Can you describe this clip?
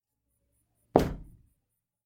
falling on the bed
made by hitting a big stuffed animal